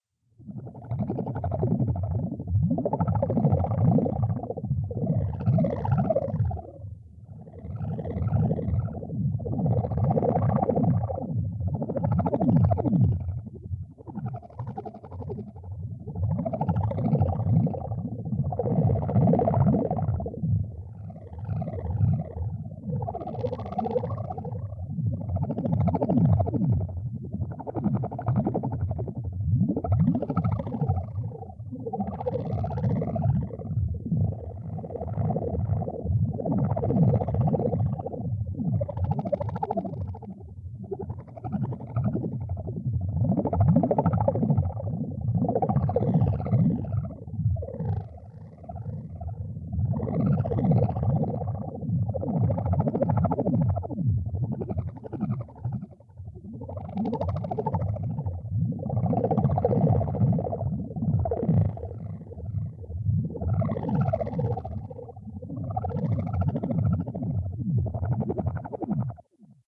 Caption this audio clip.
beneath alien waves, its liquid, but it ain't water....oh and its from 1950